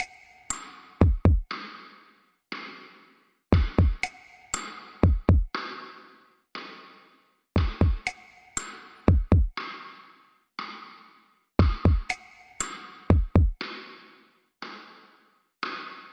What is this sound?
A beat made using Kontakt Player. At 119 BPM.